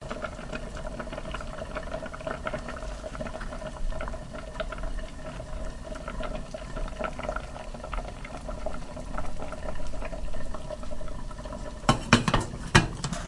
Bubbling water boiling
Bubbling water
Digital recorder
potion, pan